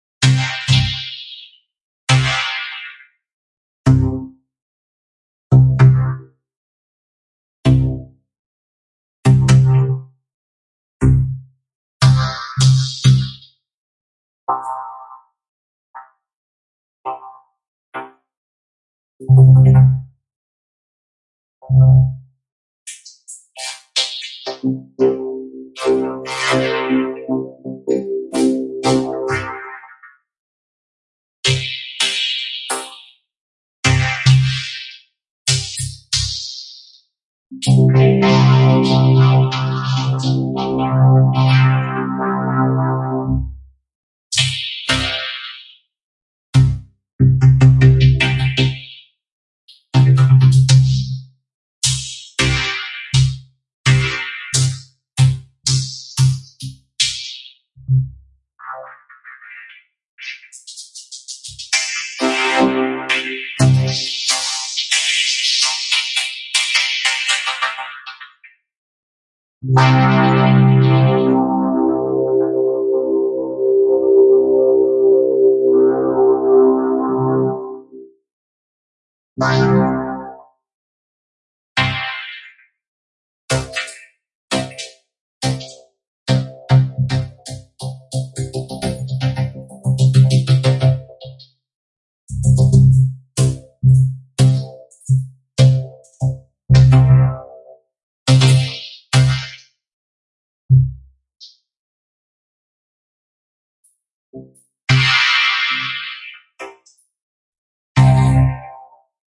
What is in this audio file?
Auna + vocodex
Recorded from an Auna mic with Vocodex effect by hitting the mic.